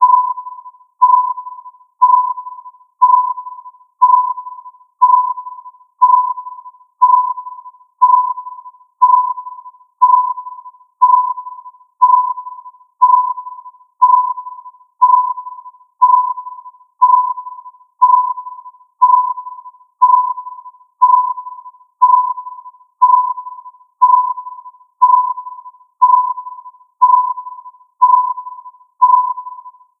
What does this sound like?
Radar, Something Detected, 30 Sec
Radar, Something Detected...
If you enjoyed the sound, please STAR, COMMENT, SPREAD THE WORD!🗣 It really helps!
detected, presence